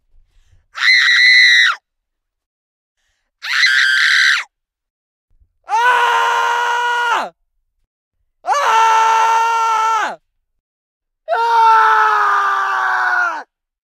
VOXScrm female and male screams dry tk MKH8060
A female and two different male people yelling in an anechoic chamber.
Microphone: Sennheiser MKH 8060
Recorder: Zaxcom Maxx
fear, horror, pain, scared, scream, shriek, yell